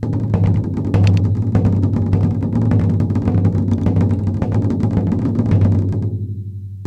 some simple drum beats for your music composition toolbox, maybe...
bits, drum, fragments